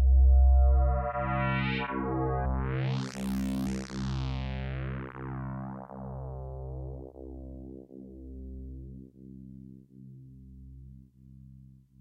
C2 Morph Bass 2
Recorded with Volca FM and Microbrute, processed with DOD G10 rackmount, Digitech RP80 and Ableton
soundscape, pad, sample, space, bass, ambient